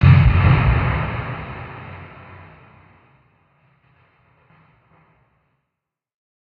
With added non linearness via a valve amp sim